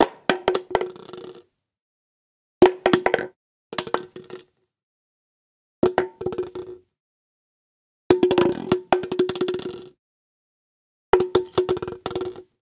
Plastic - Bottle - Drop - Falling - Bounce - Empty - Concrete - Hollow
Recorded this in my backyard at midnight, my neighbors probably hate me now.
Recorded on a Tascam DR-70D with a Rode NTG-3.
File is stereo but contains two of the same mono track for ease of use.
Slight compression, EQ, cleaning and limitting added for extra cripness.
Plastic, Bounce, Empty, Falling, Bottle, Concrete, Hollow, Drop